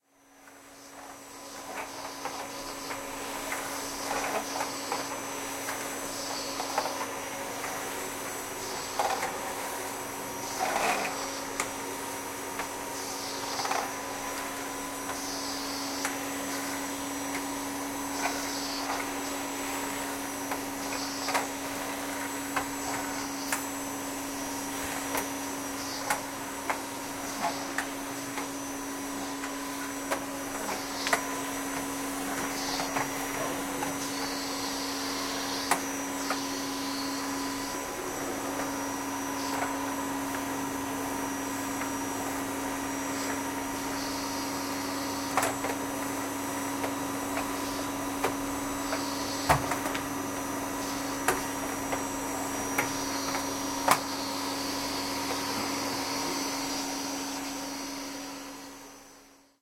Clara Vacuum
Recording of vacuuming a wooden floor.
cleaner, cleaning, floor, hoover, vacuum